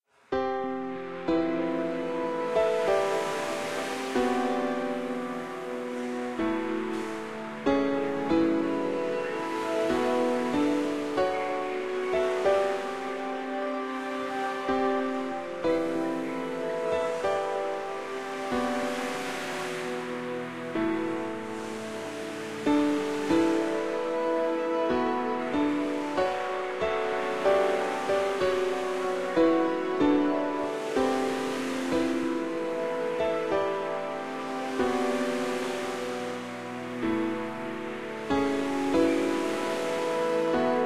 Final Fantasy Style Loop
A smooth Final Fantasy or maybe Kingdom Hearts style loop, gentle, cinematic and videogamish.
beach, ensemble, fantasy, final, gentle, harmonic, s, smooth, soundtrack, waves